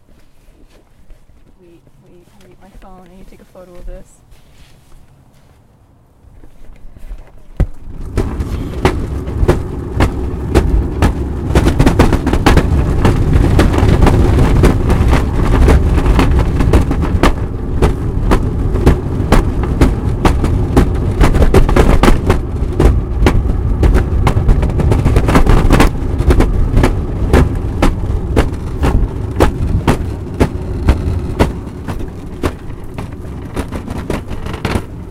Pushing the cart
i recorded pushing a cart on pavement to edit into a stampede
daw, field-recording, made-of-rice